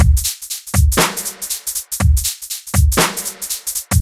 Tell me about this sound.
beat rhythm swing hihat double kick 120 bpm
120, beat, bpm, drumloop, loop, Maschine, rhythm